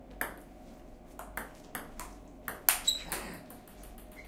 Office staff play ping-pong. Office Table Tennis Championships sounds.
game, office, ping-pong, play, sport, staff